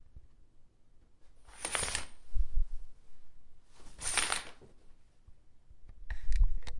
Open Close Curtain
Opening and closing a curtain, metal rings on curtain rod
close, closing, curtain, curtains, open, opening, ring, rod